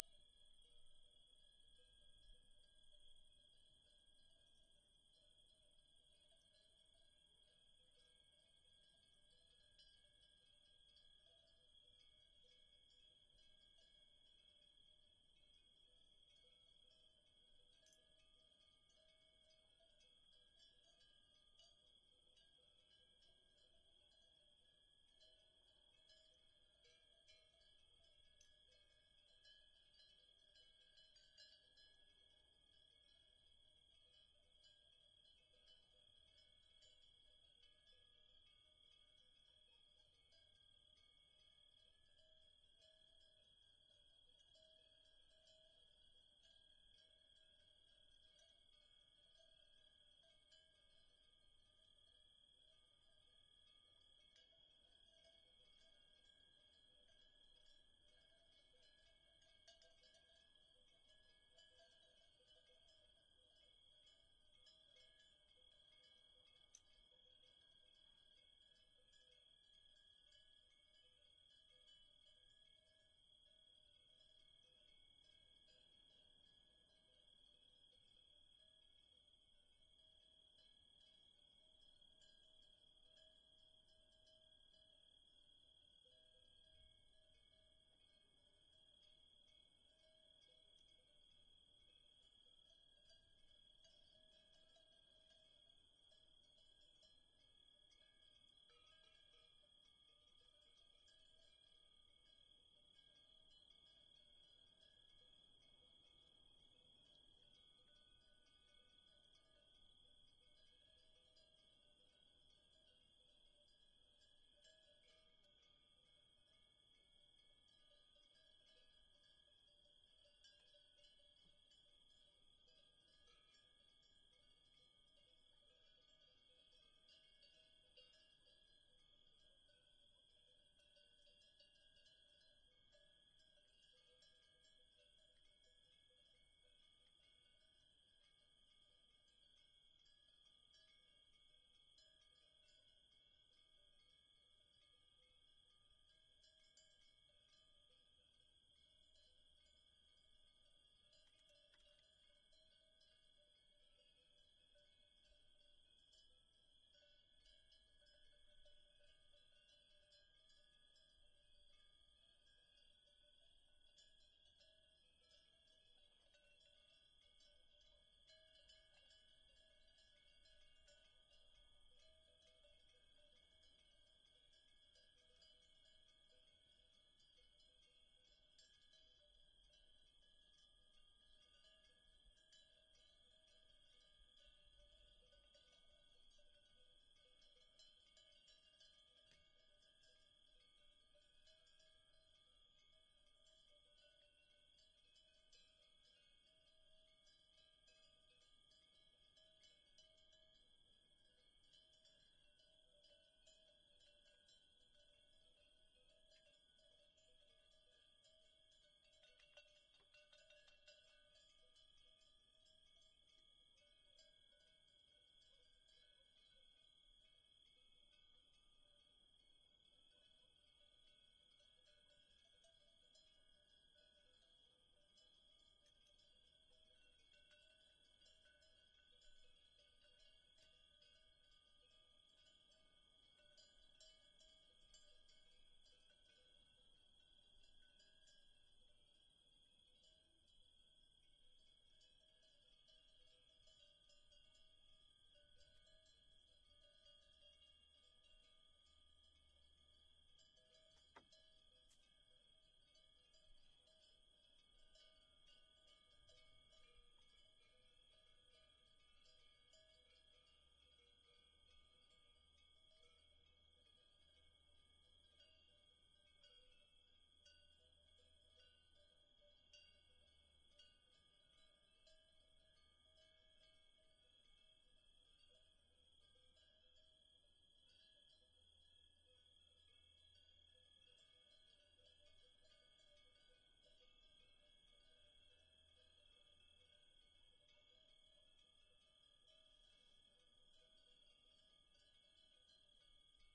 2020 July 11 Portugal Evora Night Cattle Cows Crickets Distance Night Ambience
Field-Recording in Evora, Portugal. A Quite moment of the night with sounds of crickets and cowbells can be heard in the distance.
Night, 2020, alentejo, Cows, Cattle, Ambience, Nature, Field-recording, Distance, Forest, Environment, Crickets, Evora, Portugal